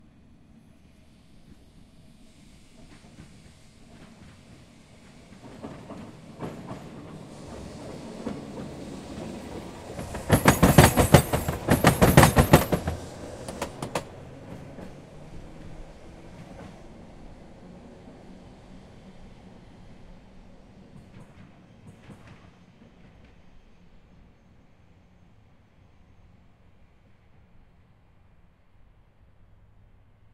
tram crossing railroad rails 2
Tram crossing railroad rails with loud rumble.
Recorded: 2012-10-13.
crossroad, noise, railroad, rails, rumble, streetcar, tram